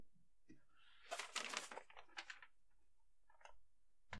Picking Paper Off Ground4
Picking a paper up off the ground.
pages, rustling, paper